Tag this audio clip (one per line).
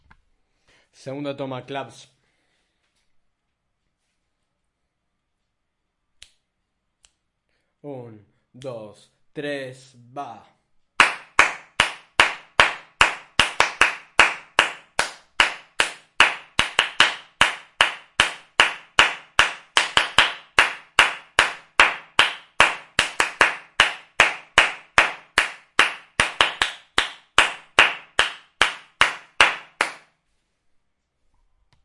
hand-clapping
clapping
uno-dos-tres